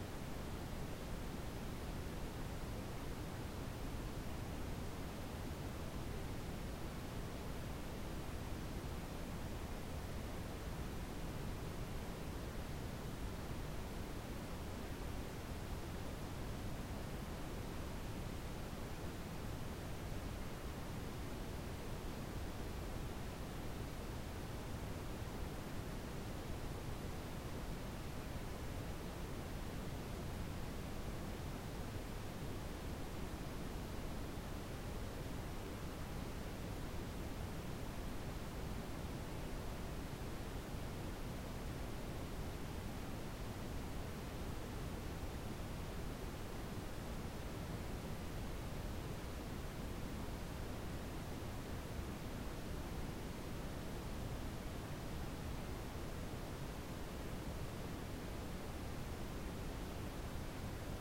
Ambience Outdoors
This is a sound created in by me, and it sounds like an outdoor ambience. Hope you like it!
Ambience, Outdoors